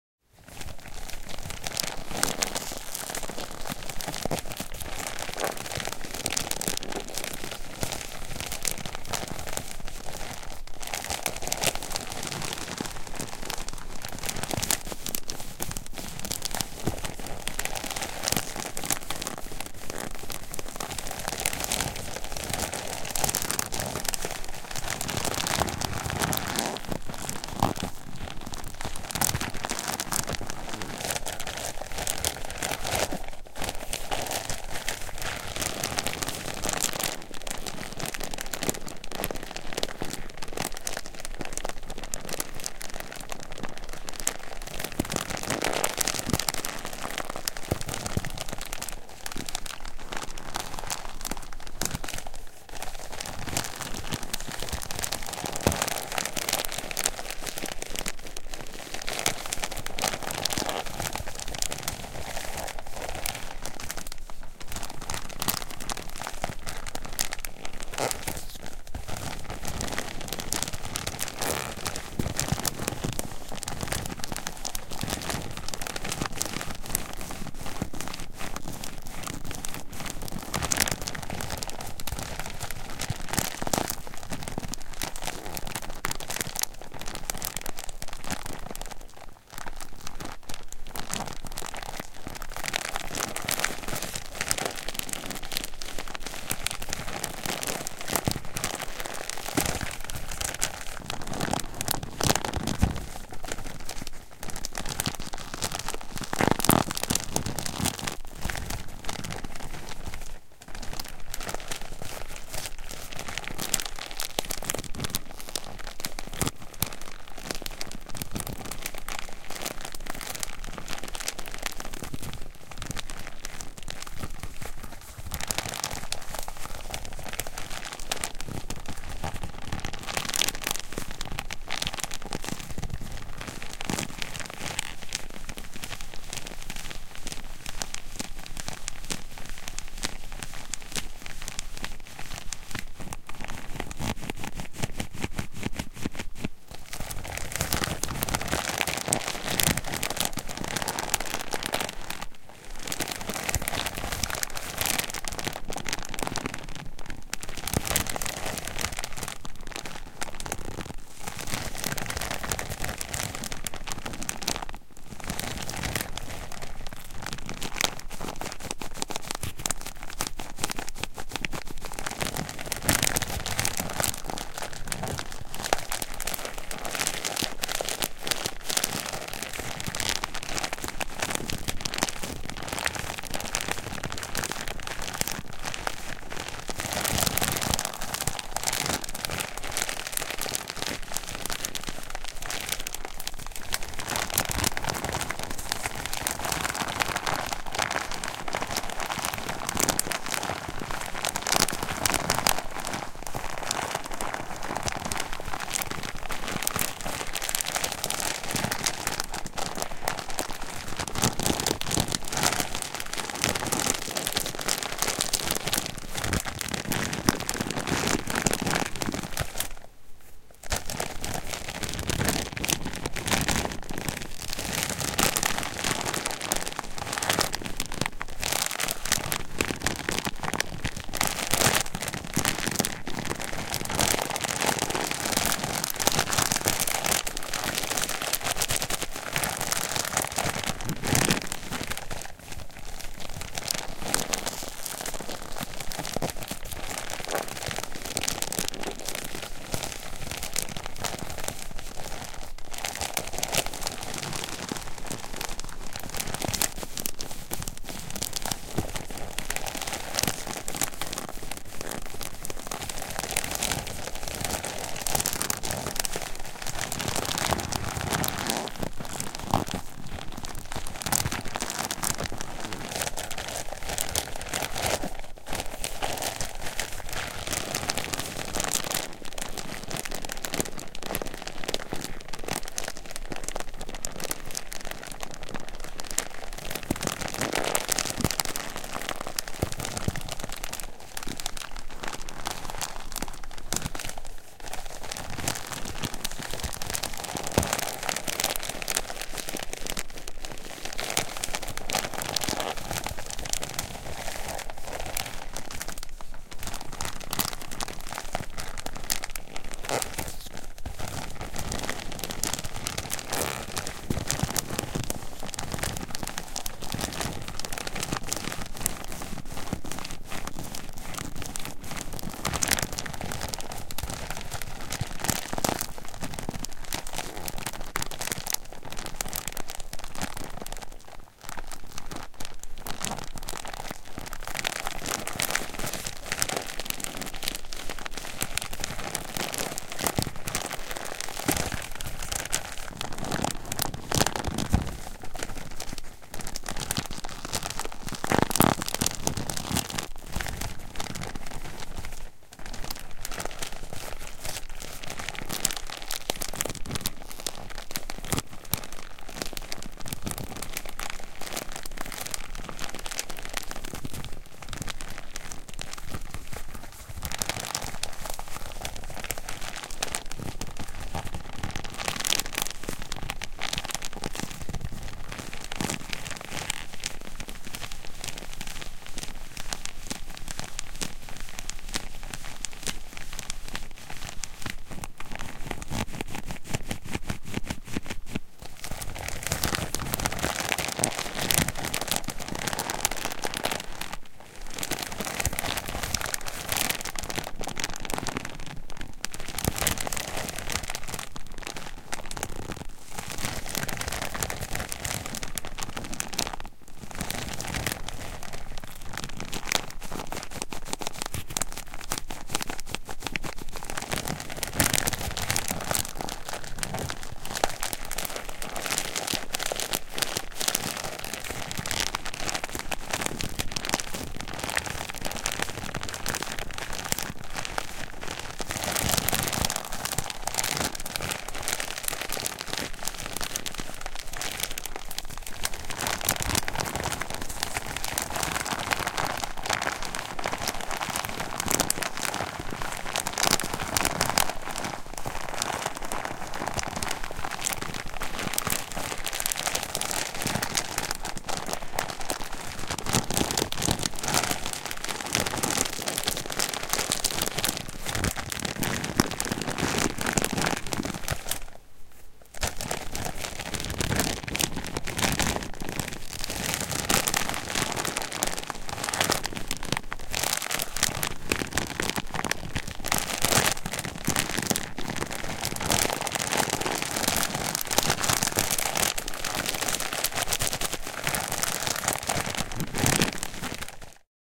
Plastic bag 2. Recorded with Behringer C4 and Focusrite Scarlett 2i2.
bag, crackle, plastic, sound, wrap, wrapping